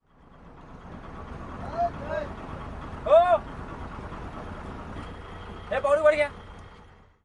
fishermen shouts India
India, shouts